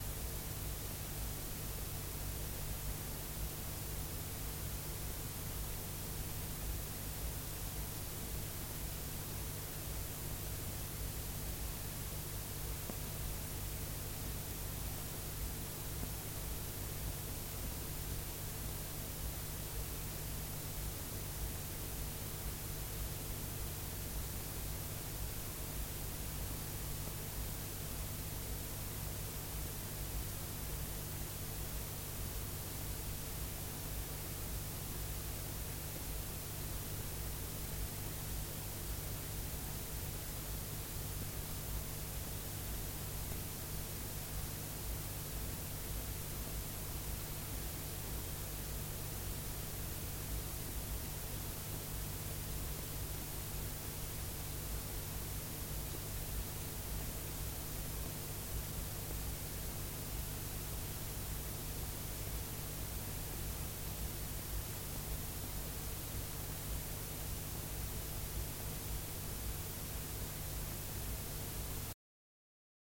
TAPE HISS Tandberg TCD 310 B
Cassette tape noise recorded by playing empty cassette. Route thru a Summit Audio 2BA-221. No processing. Played on a Tandberg TCD 310.
<3
noise, cassette-tape, hiss, static, ground, tape, cassette, hum